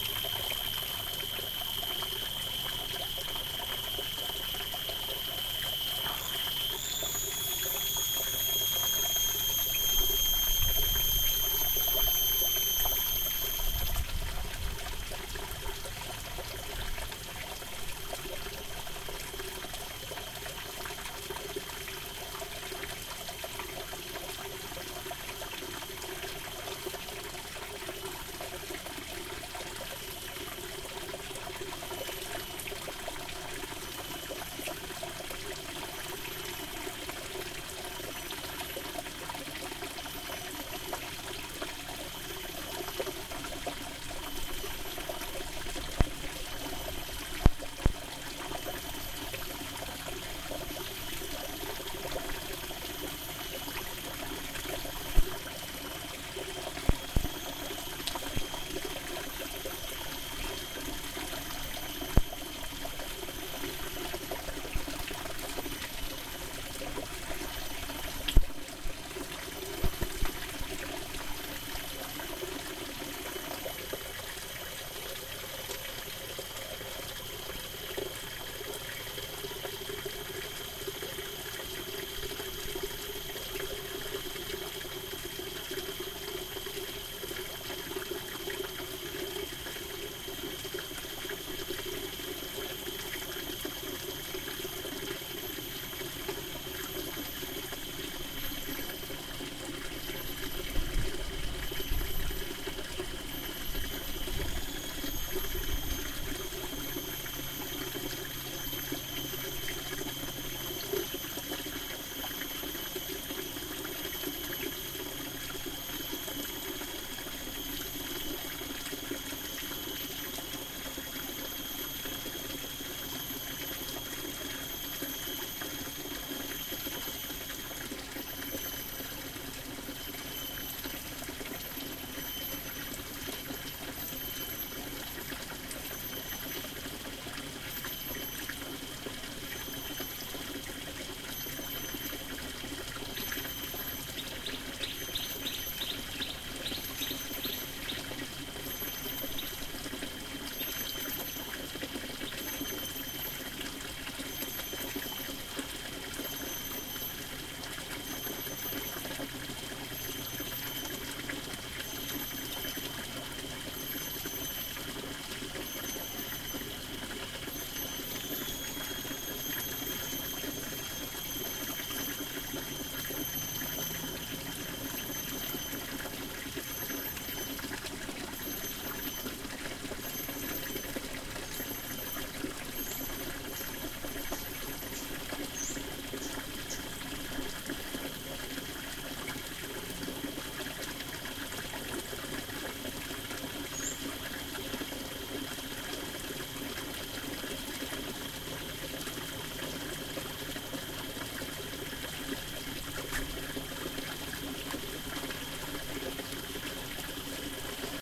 Bako National Park, Borneo Island, Rain Forest Ambience near a water stream daytime 01.10.2013 Roland R-26 XY Mics
Recording made with Roland R-26 XY Mics at Bako National Park, Borneo Island, Rainforest ambience near a water stream during daytime of 01.10.2013
Very loud cicadas included
ambience, Borneo, cicadas, exotic, field-recording, insects, jungle, loud, Malaysia, rainforest, stream, tropical, water